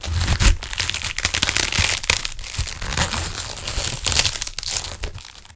Multiple cracks 4
Some gruesome squelches, heavy impacts and random bits of foley that have been lying around.
blood, foley, gore, splat, vegtables, violent